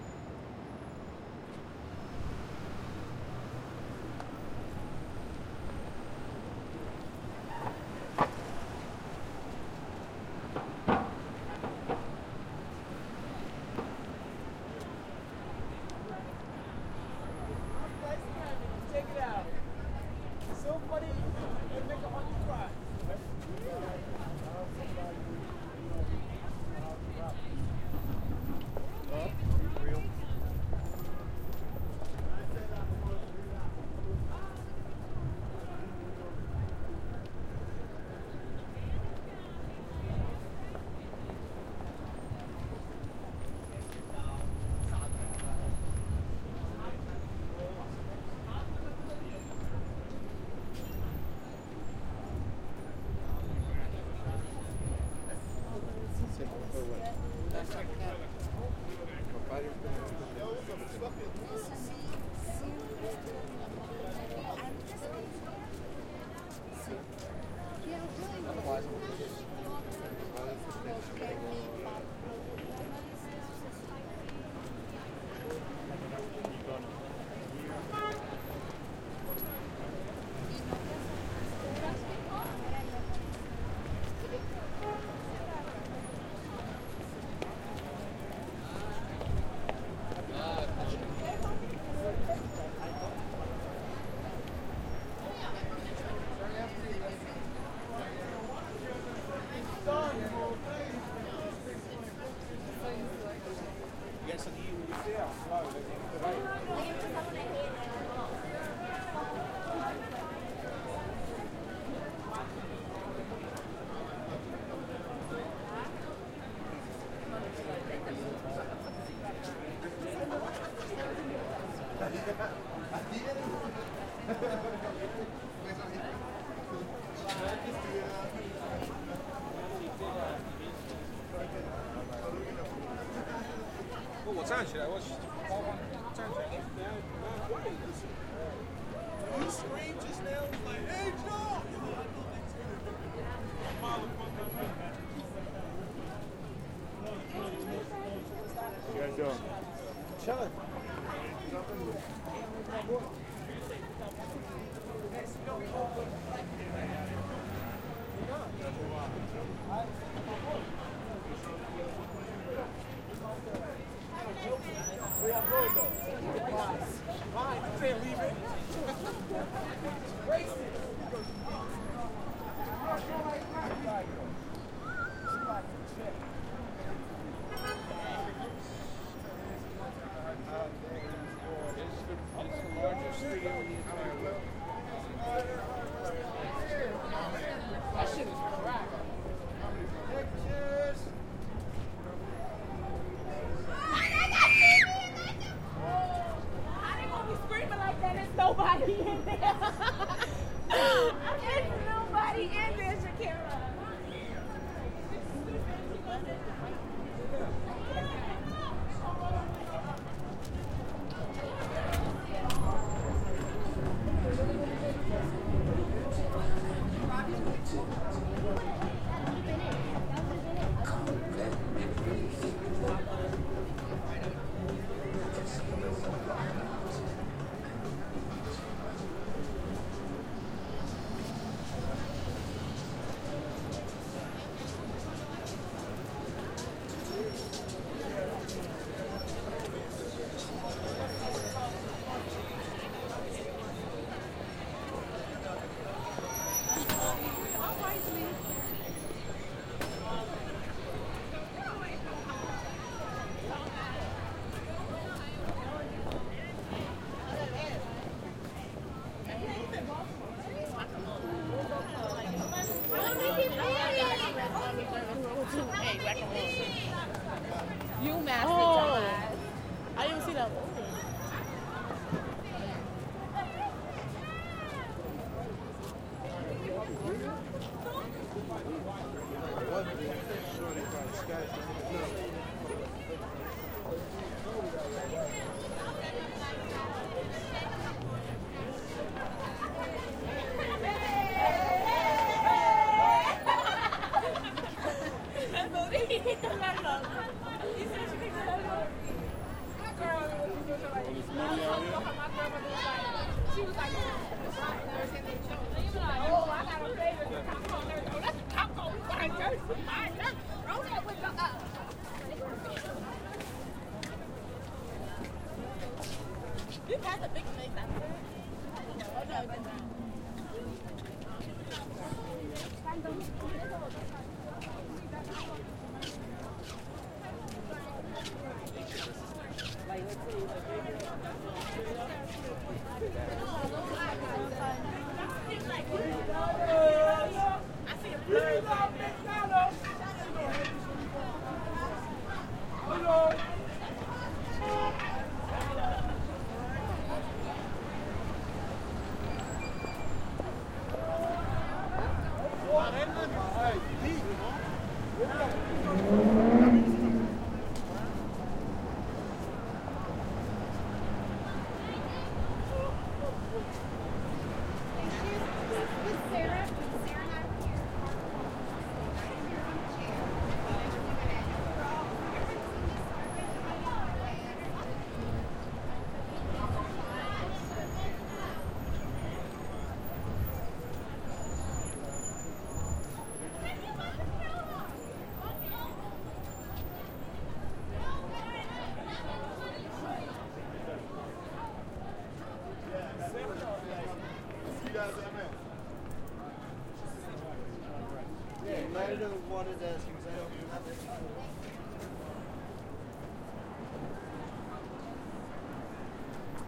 015 walking time square part 2
Walking around Time Square in New York City at around midnight Friday March 2nd (Technically Saturday the 3rd, but you know what I mean). It was a bit windy that night so unfortunately there is some wind noise.Recorded with Zoom H4 on-board mics and included wind muff.Part 1 of 2 (walking on 7th back towards where I started)
cityscape, crowd, field-recording, new-york-city, night, noise, people, times-square, traffic, walk